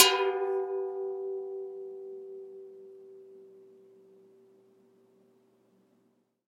Listen to the sound of these gorgeous cans of energy drinks. every can that is recorded in this samplepack has still not been opened.